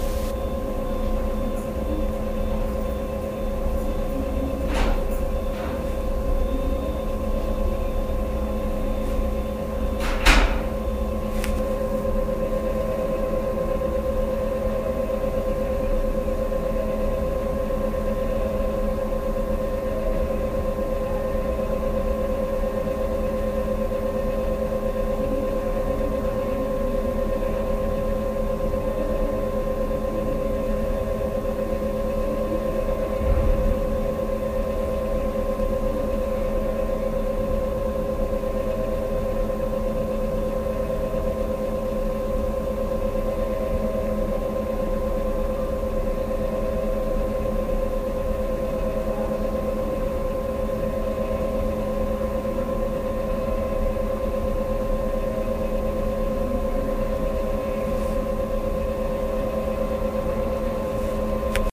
weird ambiance
This sound was hypnotic enough that I had to record it. Unfortunately, I can't remember where I did it! I think it was in the utility room at school.
weird, background, atmosphere, hum, ambiance, ambient, background-sound